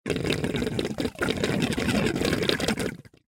various sounds made using a short hose and a plastic box full of h2o.